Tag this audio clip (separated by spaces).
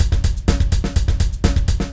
heavy metal 125 drums rock bmp percussion loop real 250 short jazz beat